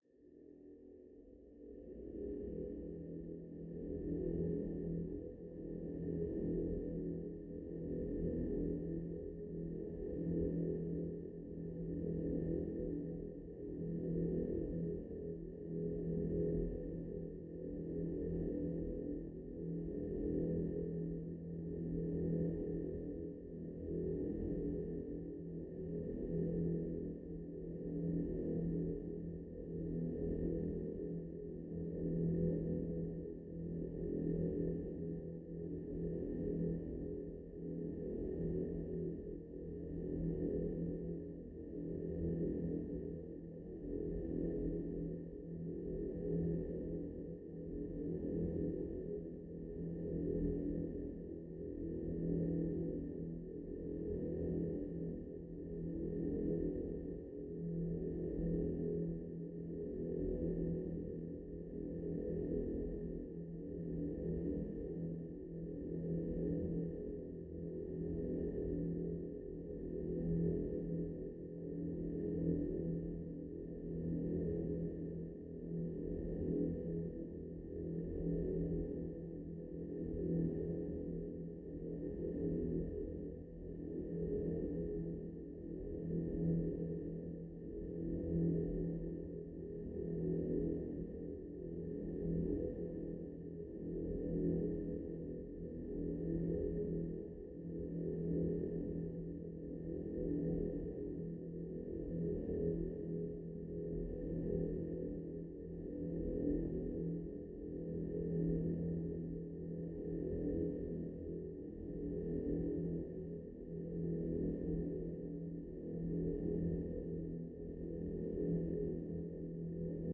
low mechanical drone created in pro tools using modulating white noise and convolution reverb